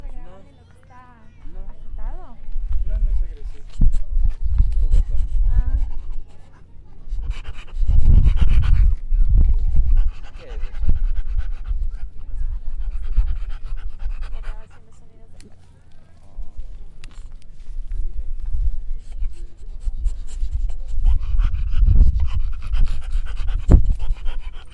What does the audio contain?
Tired dog
Sonido de un perro cansado
tired, pet, park, dog, animal